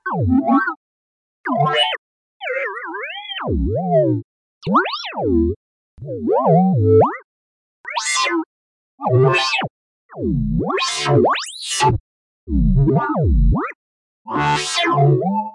Robot Noises
some robot sounds made by filtering a hard digital synth in FL studio.